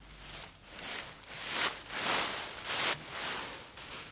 Sound Description: a person walks through autumn leaves
Recording Device: Zoom H2next with xy-capsule
Location: Universität zu Köln, Humanwissenschaftliche Fakultät, Herbert-Lewin-Str. in front of the IBW building
Lat: 50.93372
Lon: 6.92183
Date Recorded: 2014-11-18
Recorded by: Kristin Ventur and edited by: Darius Thies
This recording was created during the seminar "Gestaltung auditiver Medien" (WS 2014/2015) Intermedia, Bachelor of Arts, University of Cologne.